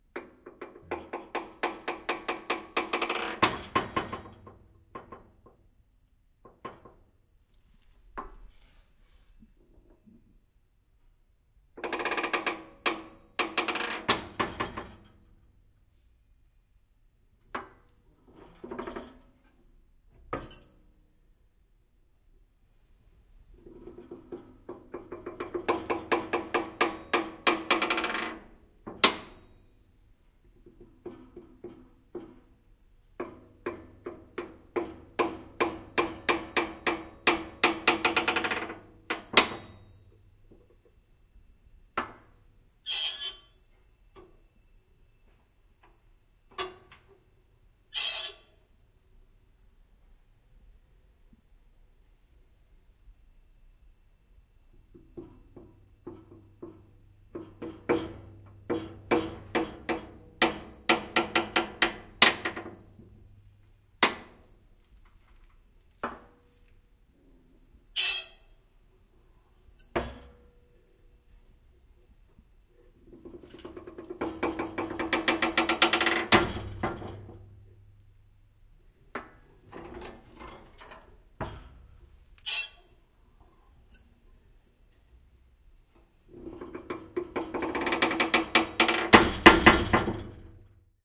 metal bender creaks clacks bending creaks squeaks bright
bending, clacks, squeaks